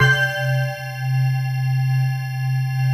Made up by layering 3 additive synthesized spectrum sounds ran them through several stages of different audio DSP configurations. FL Studio 20.8 used in the process.
bell, bells, chime, church-bell, clang, clanging, dong, gong, metal, metallic, natural, organic, ring, ringing, synth, synthesis